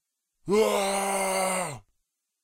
A deep yell before charging into battle.